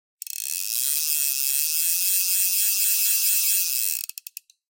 Angel Fly Fish Reel Fast Pull 1
Hardy Angel Fly Fishing Reel pulling out line fast
clicking, fly, turning